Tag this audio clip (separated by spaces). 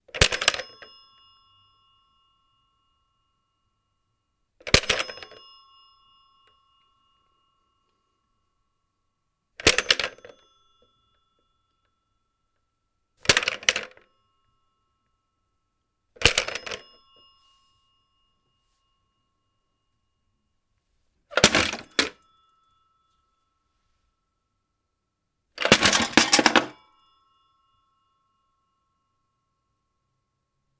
Tonal Ringing Plastic Finish Rattling Bell Hit Handset Boom Old Clink Crash SABA-W48 Impact Telephone METAL